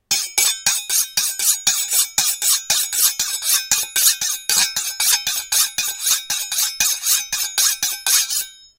Have you tried to sharpen a kitchen (butcher)knife by means of a round steel, the type all kitchen personnel use? My knives were sharper before my attempts. My wife had some words for me when she used the knives. She had to buy a new kit.
sharpen, knife, kitchen, cutlery